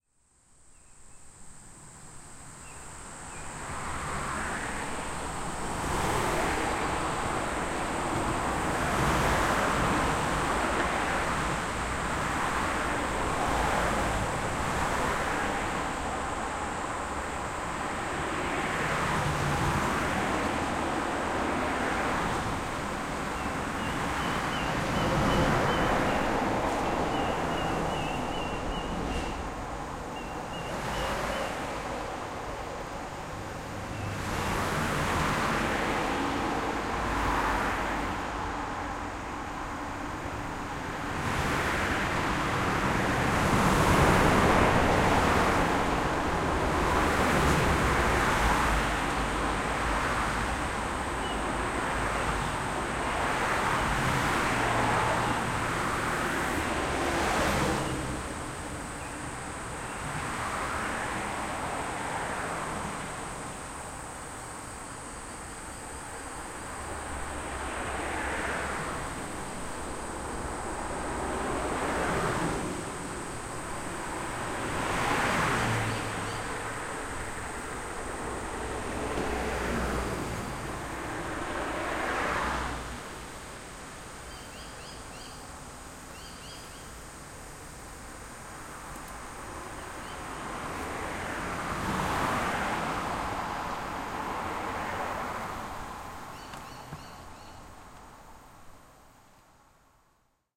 The sound of cars passing overhead heard from underneath a bridge.
Recorded using the Zoom H6 XY module.
busy, car, cars, city, field-recording, road, street, traffic, urban